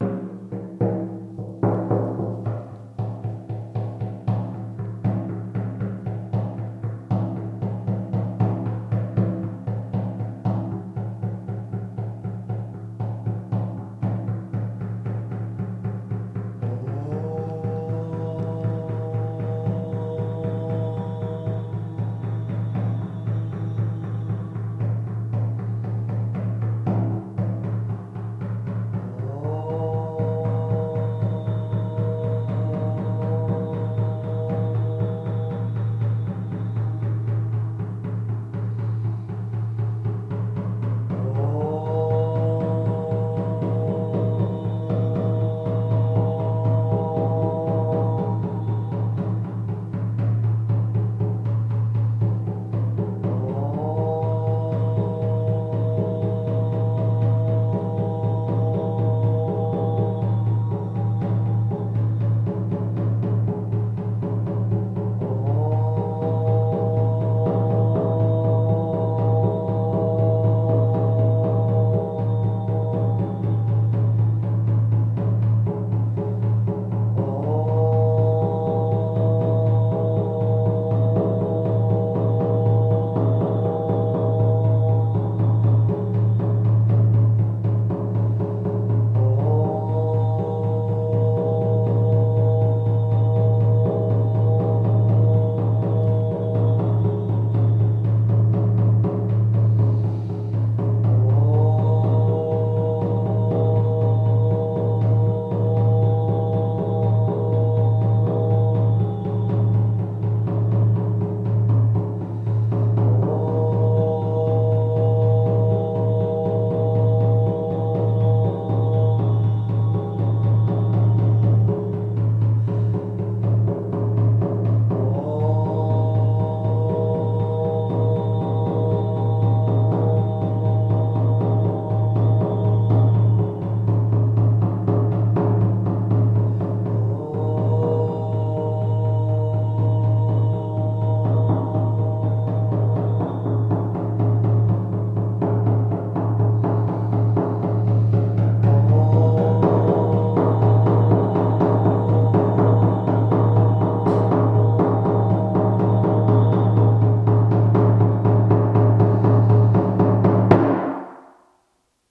Shaman Frame Drum with Om Mantra Chanting 1
130-bpm, chant, chanting, drum, drum-loop, drums, healing, journey, mantra, percussion, percussion-loop, percussive, shaman, shamanic, sound, vibration